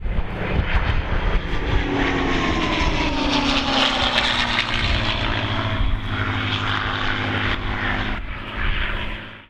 Fast pass R-L of a P51 Mustang. Clip has nice supercharger whine from the Merlin Engine. There is a little bit of wind noise in background.